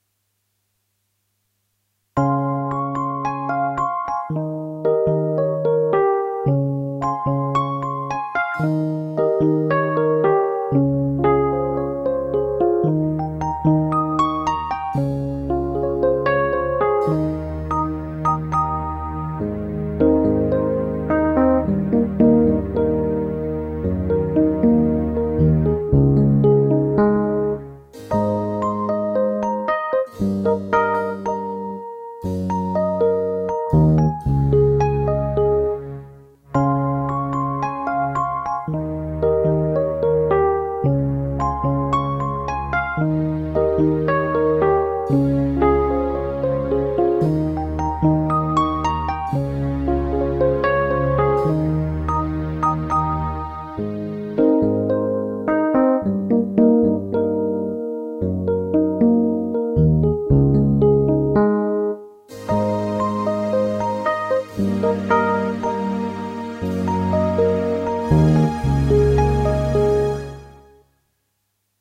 Sweet vibraphone melody over chorus and a little bass. All mixed through Audacity. Romantic reflection or interlude.
Sweet, Cinema, Transition, Interlude, Funky, Film, Loop, atmosphere, Romantic, Ambiance, Vibe